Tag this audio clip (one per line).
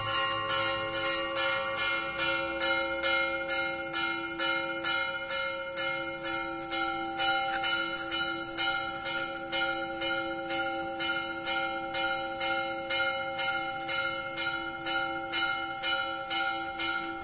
bells
church
small